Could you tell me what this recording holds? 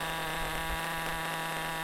engine model high revs 2
Vehicle's engine high rev noise